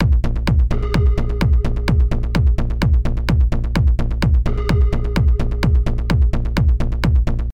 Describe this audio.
Through the Caves
A full out Dark Trance loop, good for home menus and a adventurous game. Loop is part of a pack containing 2 of the other loops.
bass, dark, trance, sound, beats, music, sonar, dance, cave